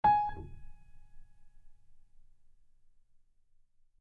acoustic piano tone
acoustic, piano, realistic, wood